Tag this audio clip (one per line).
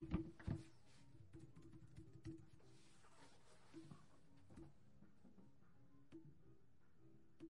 Logging
Piano
Practice